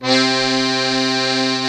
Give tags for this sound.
accordeon hohner master

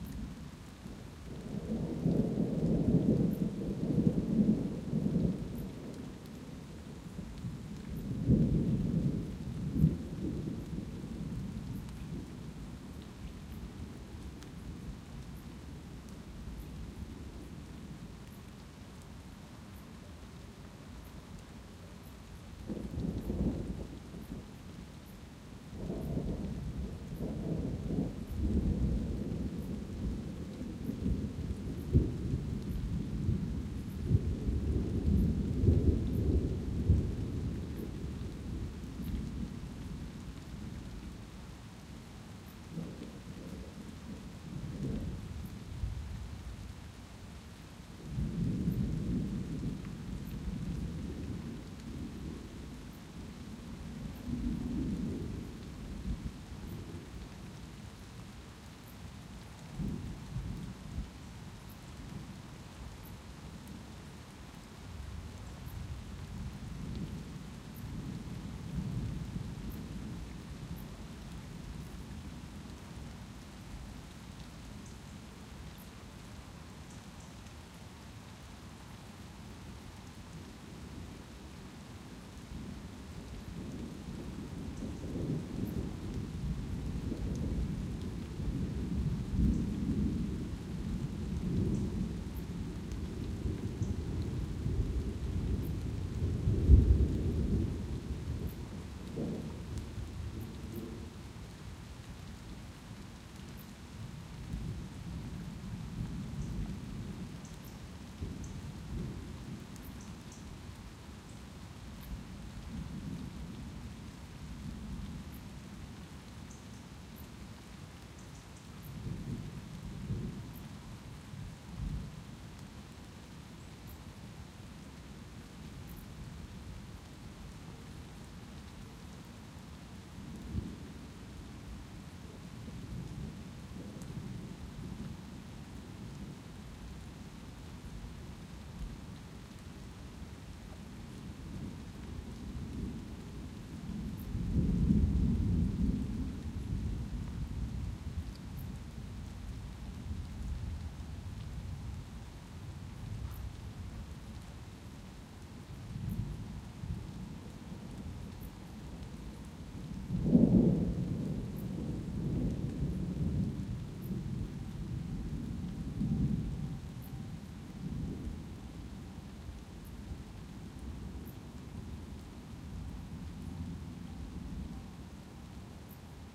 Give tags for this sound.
ambience ambient atmo atmosphere austria field-recording forest light nature rain thunder w4tel waldviertel wood woodquarter

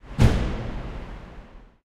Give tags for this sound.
bang campus-upf car centre comercial door glories mall park parking shopping UPF-CS13

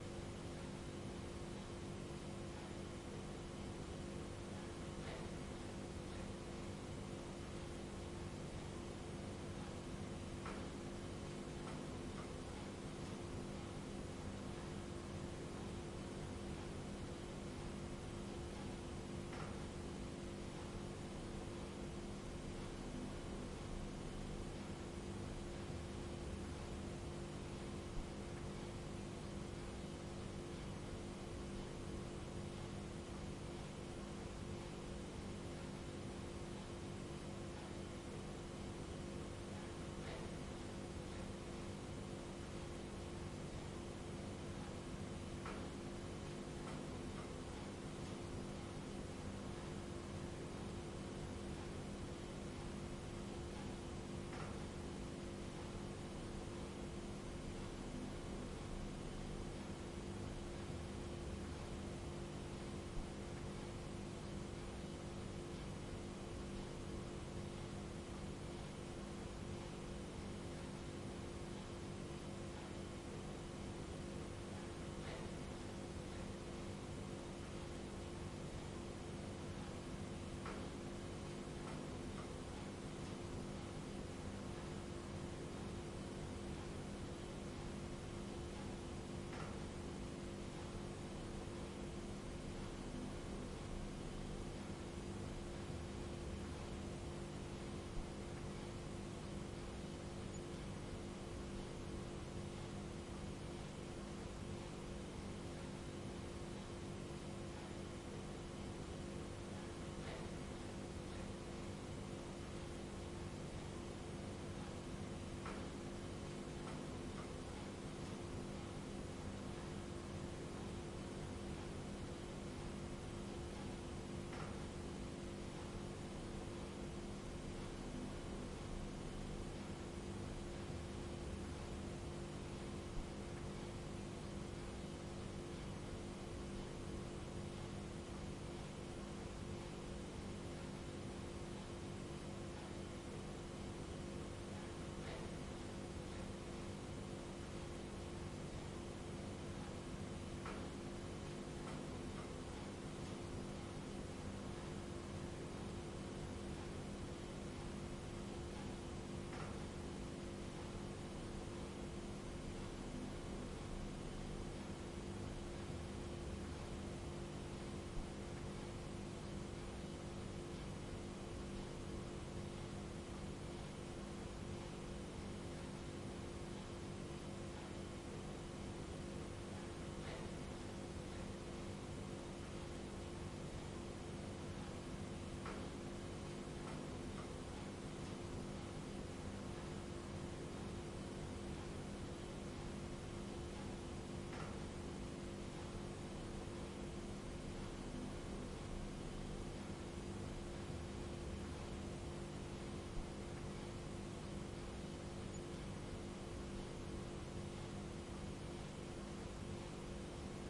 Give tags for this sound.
ambience ambient atmosphere background background-sound house OWI quiet-house

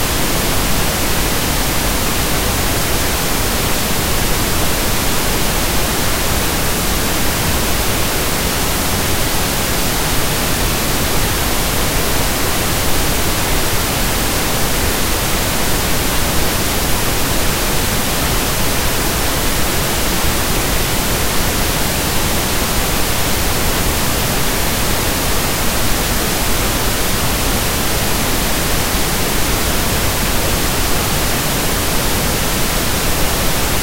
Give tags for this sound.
audacity noise

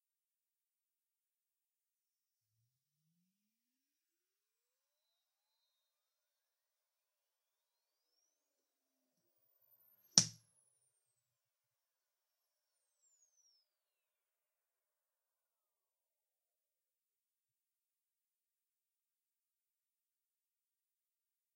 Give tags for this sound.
convolution impulse-response

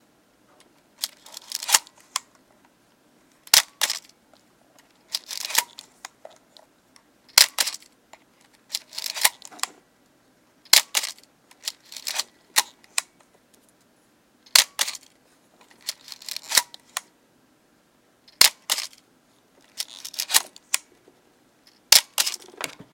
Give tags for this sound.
Canon wind-shutter-sound 35mm

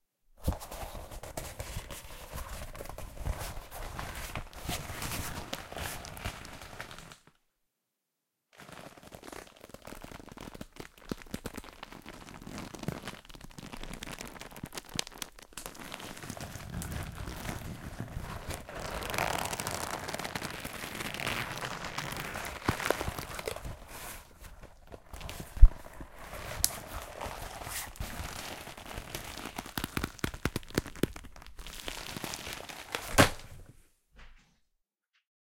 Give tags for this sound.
ASMR handling

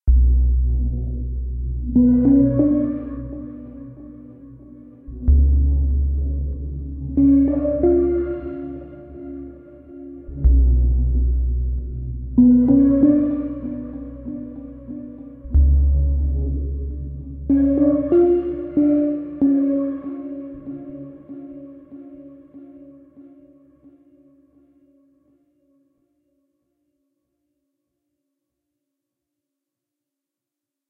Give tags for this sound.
ambience ambient deep effect electronic future futuristic fx impulsion machine noise pad rumble sci-fi space spaceship starship

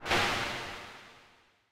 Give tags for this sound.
audio beat effext fx game jungle pc sfx sound vicces

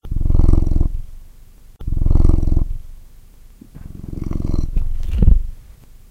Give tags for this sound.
cat cat-sounds animals tiger lion purring purr cats

